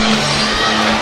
Loopable snippets of boardwalk and various other Ocean City noises.